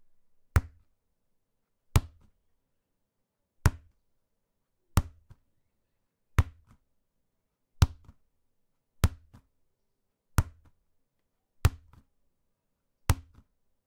A soccer ball dropped onto a wood floor. 10 samples. Schoeps cmit-5u. Focusrite preamp.